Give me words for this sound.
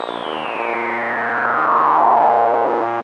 Some kind of digital stretching sound or something like that.